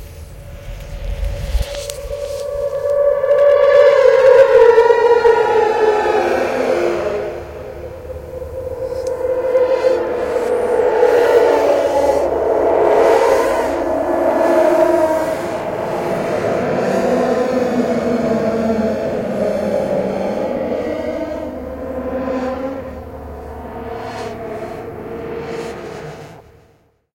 Created this by layering, pitch-editing, and adding reverb + echo to an existing sound I'd recorded.